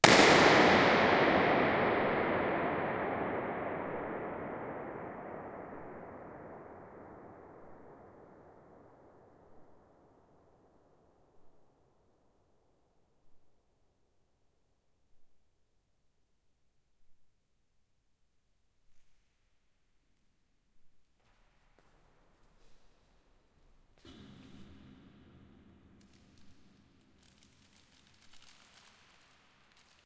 Balloon burst 2 in Emanuel Vigeland mausoleum
A balloon burst in the Emanuel Vigeland mausoleum, Oslo, Norway.